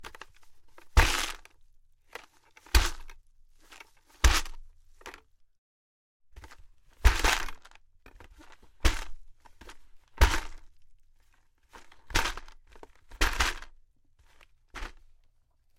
bucket of ice put down on carpet thud rattly
carpet, ice, rattly, down, bucket, thud, put